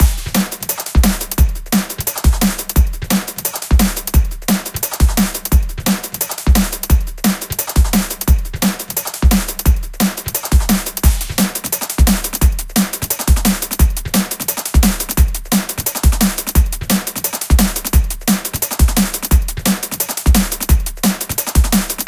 Spyre Break 25
Punchy off-beat break. Made in Bitwig studio.
174bpm, 25, break, dnb, drum, electronic, punchy, sequenced